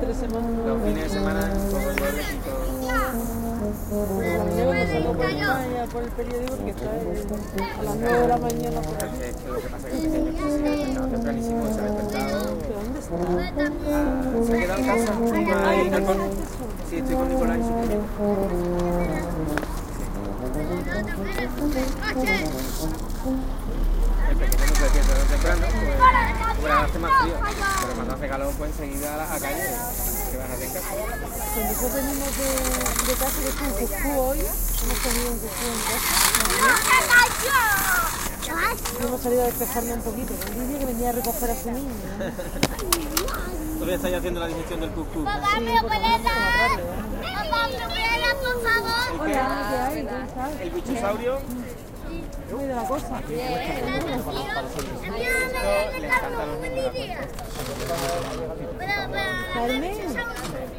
ambiance in a park (Alameda de Hercules, Seville), with voices of adults and kids playing. Edirol R09 internal mics
ambiance, city, field-recording, park, playground, sevilla